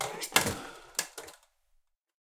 tuyau-seche-cheveu 1
dried hairs hose fall
noise, plastic, fall